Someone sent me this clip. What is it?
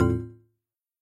Bonk Click w/deny feel
snythetic Deny click - with bonk feel
interface, press, switch, synthetic